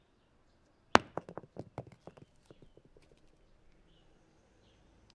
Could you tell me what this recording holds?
Rock falling on hard ground: The sound of a relatively small stone being dropped onto a hard brick surface, hard impact sound. This sound was recorded with a ZOOM H6 recorder and a RODE NTG-2 Shotgun mic. Post-processing was added in the form of a compressor in order to attenuate some of the sound's transients that caused clipping, while still keeping the rest of the sound's levels audible and vibrant. The sound was recorded on a sunny, relatively quiet day, by recording someone dropping a stone on a hard surface with a shotgun mic.
rock-falling-sounds, falling, rock-falling, stone-falling, stone-falling-sounds, hard-impact-sounds